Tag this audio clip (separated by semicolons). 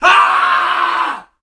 afraid; cry; fear; fearful; fearing; frightened; frightful; horror; panic; panicking; scared; scream; terror; yell